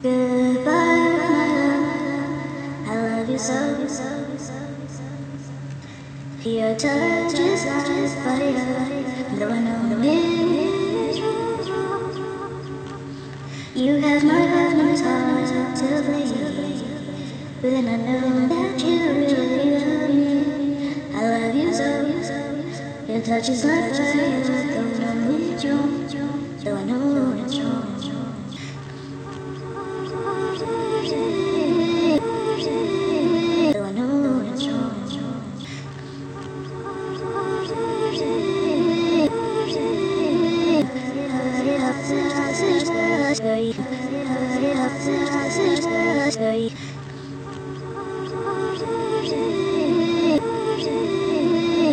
Good-Bye, My love
This is me singing, though its speed up and the pitch is kinda high. something you could ad to Dubstep or an song. my voice and my Lyrics, link me if you've done something with it.
Sining, Echo, Chop, Vocal